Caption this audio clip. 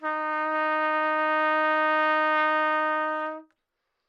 trumpet-dsharp4
Part of the Good-sounds dataset of monophonic instrumental sounds.
single-note, trumpet